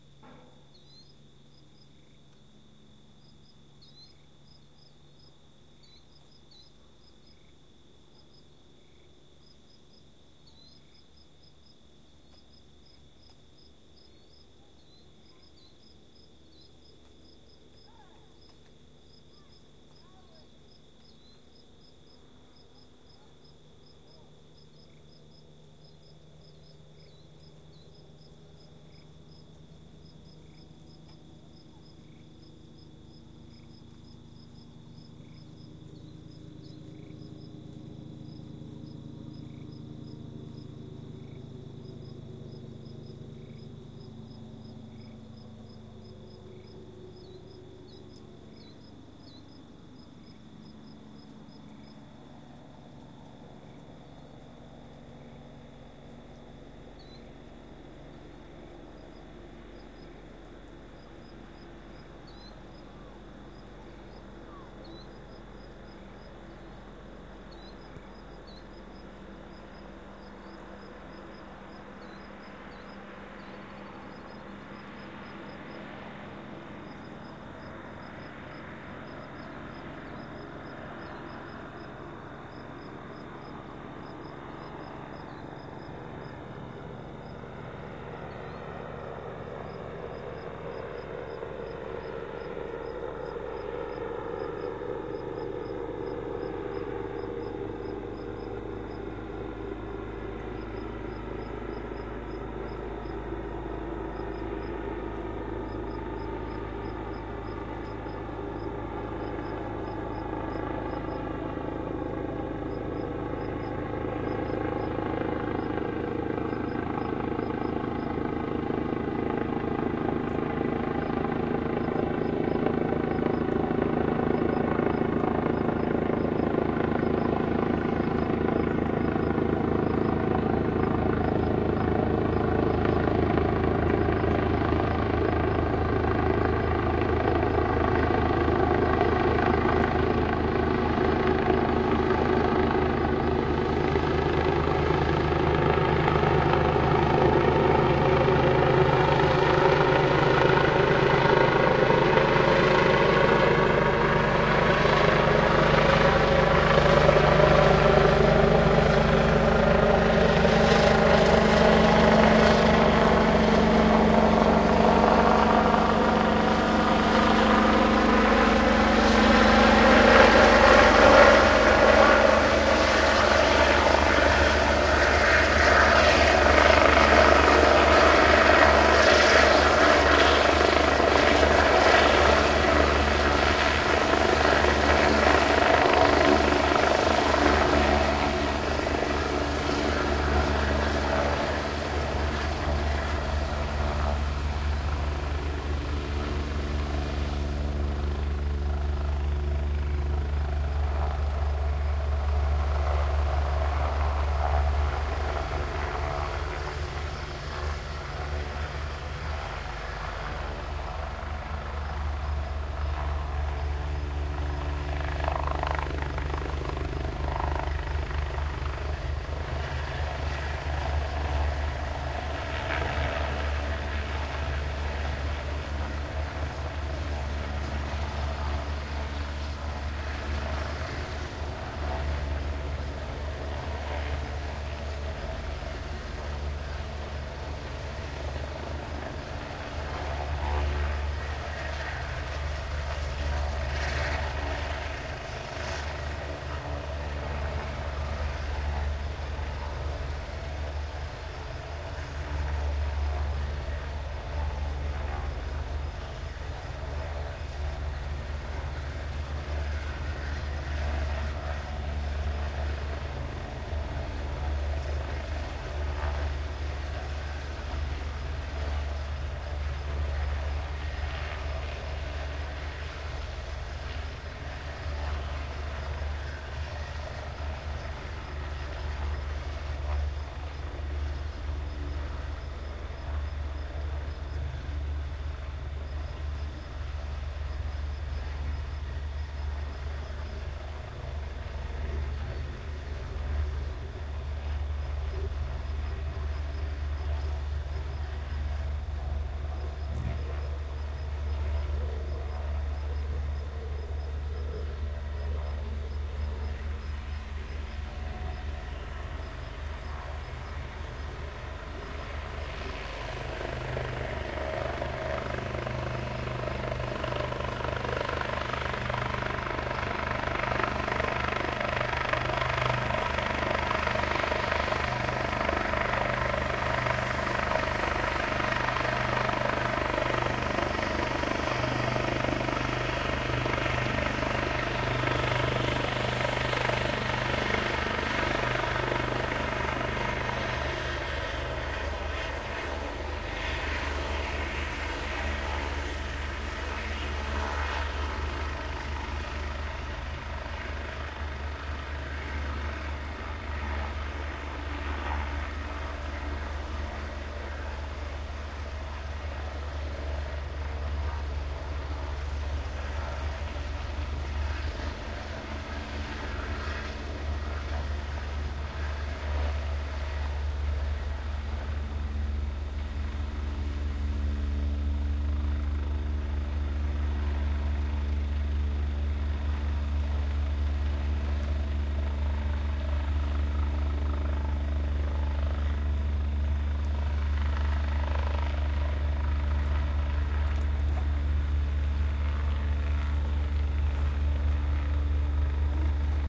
Long clip of helicopter flying in, landing, and taking off again. I left a lot before and after so you can fade it in and out as much as you want. Used iZotope RX5 to remove loud bird sounds during the main portion of the helicopter sound.
helicopter, landing, take-off